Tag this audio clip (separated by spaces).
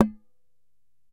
struck can crashed thump hit whacked crash banged metallic metal knocked thunking bang smack thunk whack empty container knock impact thumped thunked collided collision impacted strike canister smacked